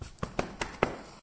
Sound of running feet
Digital recorder